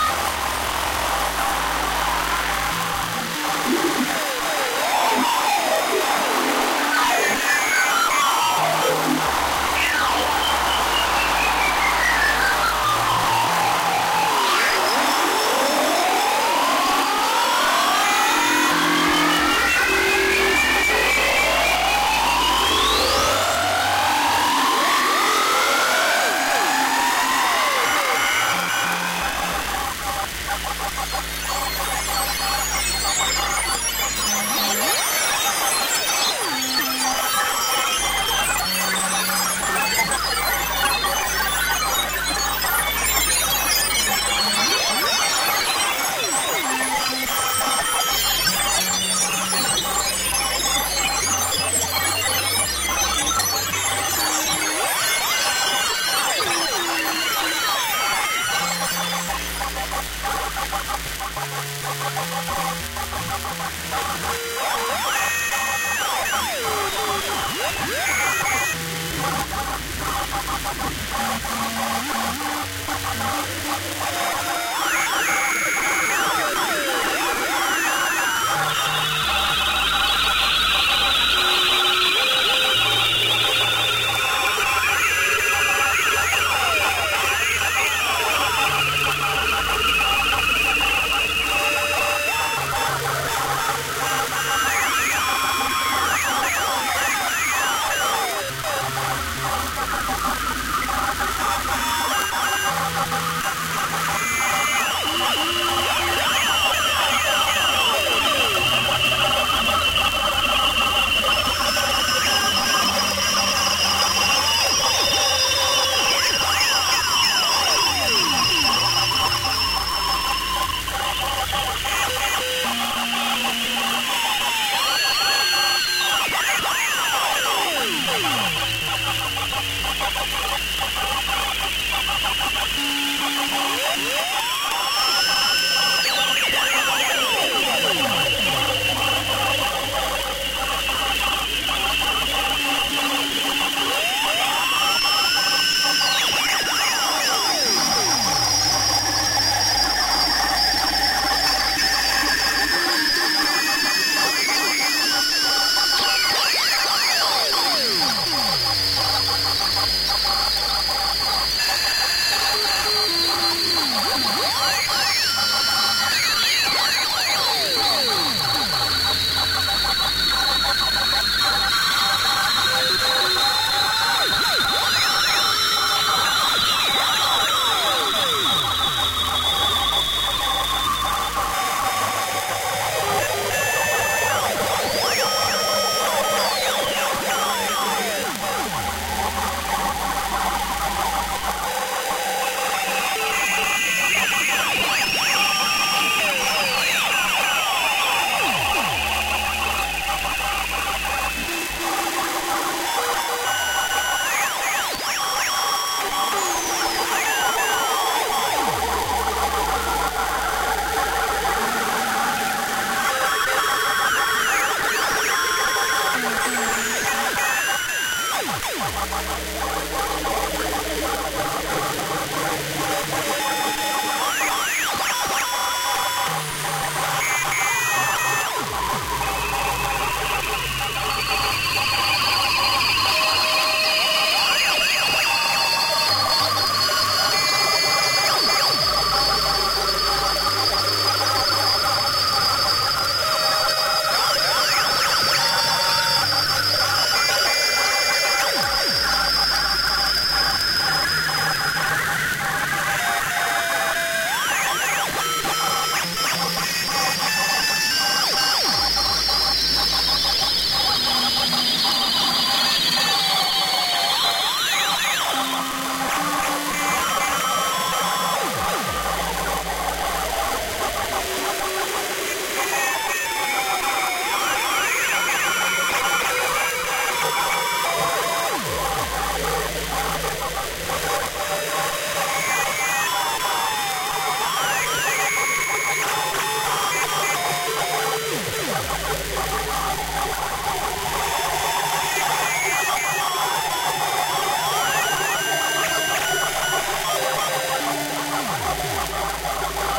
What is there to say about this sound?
radio am 02
Fully synthesized AM/shortwave abstract radio sounds. This is not recording, but track made with VST synthesizers and effects.
Sounds are abstract, there is no voice or meaningful transmission behind them. They recall overall atmosphere of shortwave radio.
shortwave, interference, radio-static, static, am-radio, radio, noise, am, radiostation